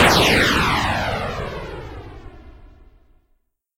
Rocket!
If you enjoyed the sound, please STAR, COMMENT, SPREAD THE WORD!🗣 It really helps!
cinematic, cutscene, film, game, missile, rocket, science-fiction, sci-fi, skyrocket, space